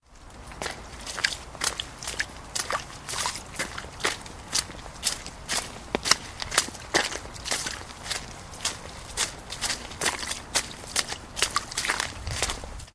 Raw audio of footsteps splashing in small puddles and some mud.
An example of how you might credit is by putting this in the description/credits: